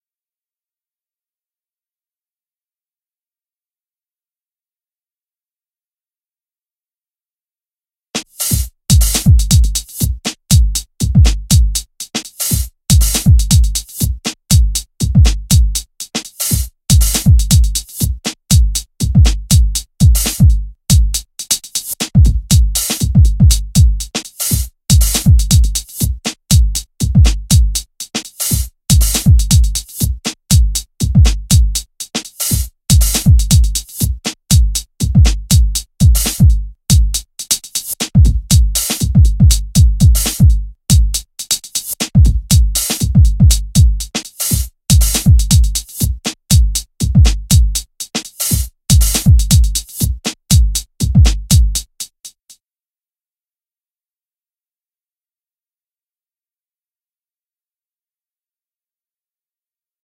gitan-drums
drums, full